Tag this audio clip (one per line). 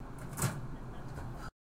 cash
register
cash-register
store